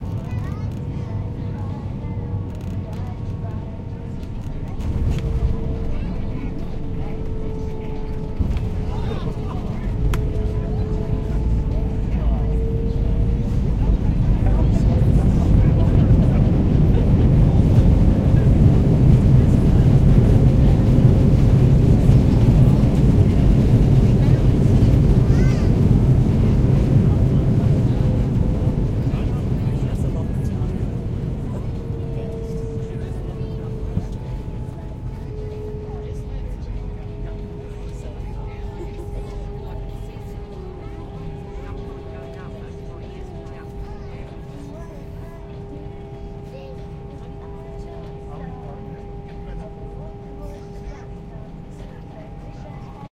Jet Star Rough Landing
This is a bouncy landing in Cairns (Australia). You can hear a thump as the plane hits the runway (00:04) the plane bounces in the air for 4 seconds and lands again (00:08) and then some people reacting to the landing.